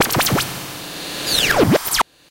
ELECTRIBE SCQUELTCH 3
Another squelchy sound I made on my Korg Electribe SX. this one was edited and part of it was reversed
electribe, sx, fx, electro